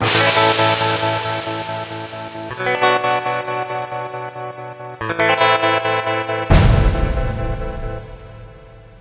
SemiQ intro 7
This sound is part of a mini pack sounds could be used for intros outros for you tube videos and other projects.
weird, digital, sound-design, soundeffect, future, electronic, soundesign, freaky, electric, effect, lo-fi, glitch, sounddesign, sfx, fx, sci-fi, noise, abstract